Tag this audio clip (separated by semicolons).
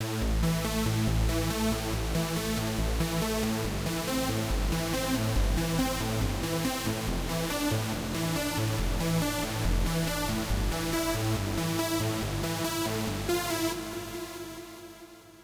LMMS; trance